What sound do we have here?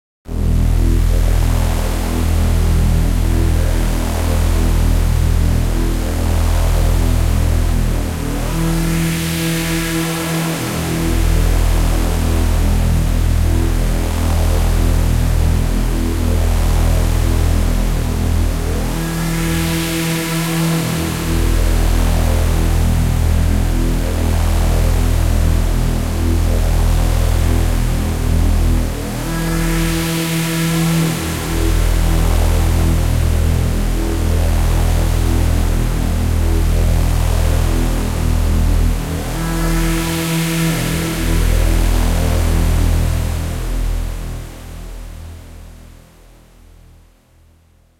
Swooshy-Drone
A deep scifi drone with slow swooshes and a pitch sweep